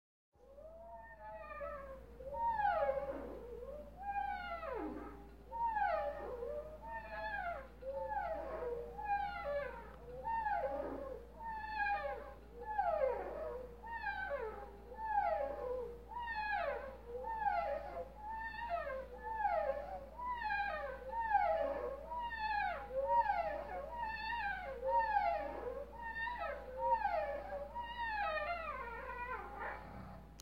Creepy sound 3* ( by FURRY )
This sound was created when washing the window :D